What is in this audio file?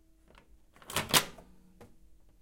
Microwave Open
opening a microwave door
door, kitchen, microwave, open